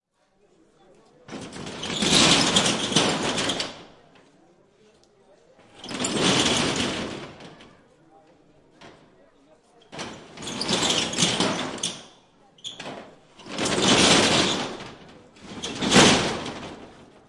Closing a metal shutter, loujloudj, Algeria, 2005
Recored with xy Audiotechnica AT822
recorded on Dat Tascam Dap1
street, recording, urban, field, metal